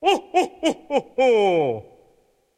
santa - ho ho ho #2
more jolly old fella from the North Pole!